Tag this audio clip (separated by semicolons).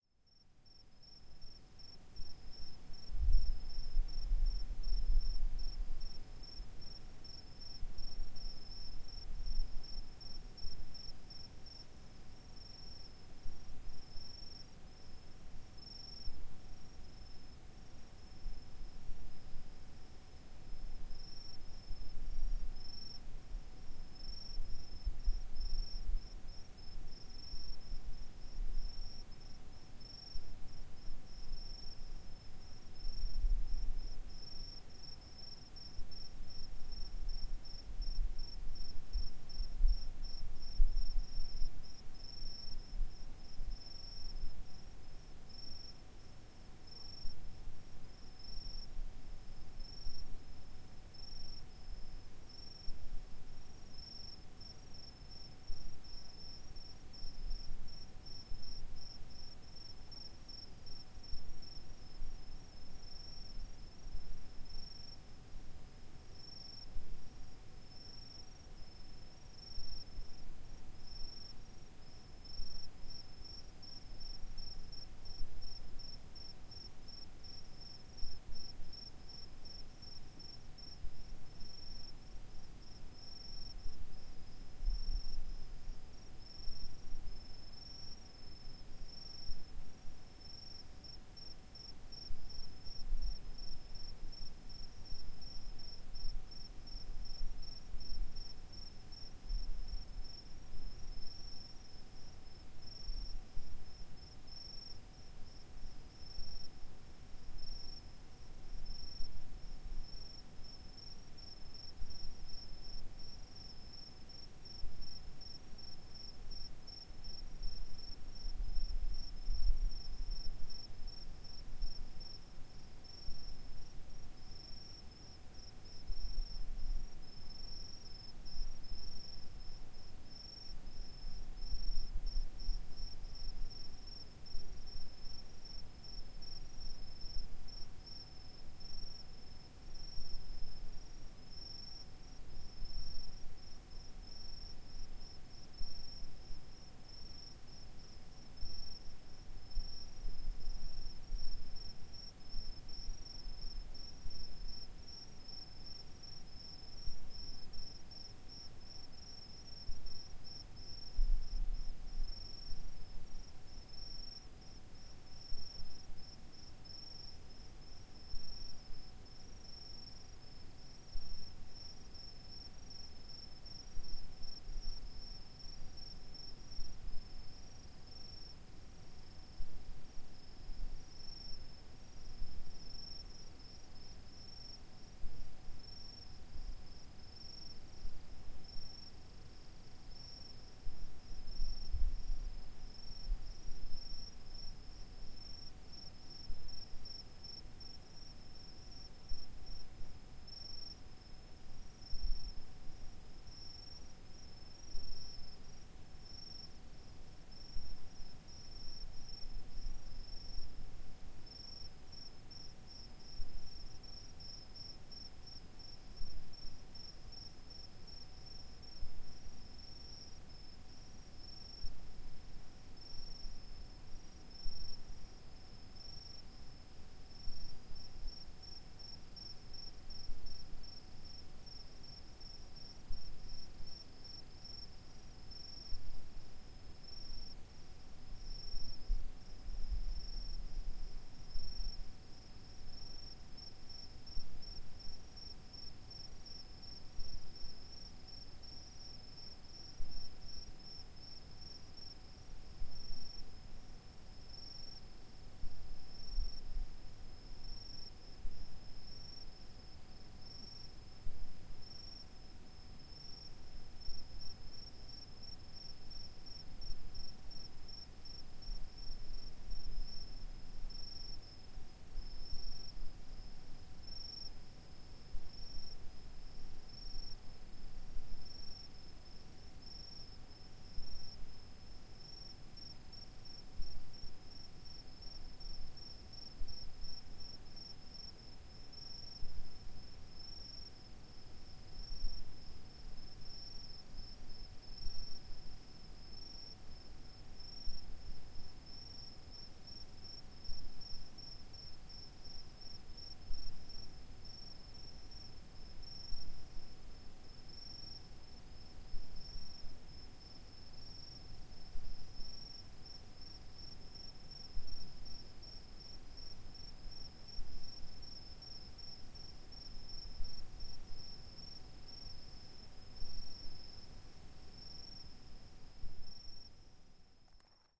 atmosphere; atoms; crickets; desert; evening; field-recording; insects; night